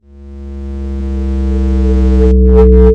Loudening oppressive sound
Sound of something big falling down.
Can be used to create anguish and an oppressive atmosphere.
Recorded using Audacity
loud, oppressive, overwhelming